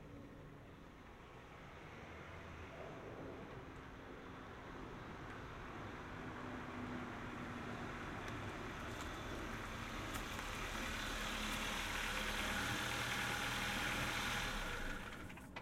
PrijezdAuto-HNUJLETADLO
Arrival of car in exterior. Sligth background noise.
arrival, car, exterior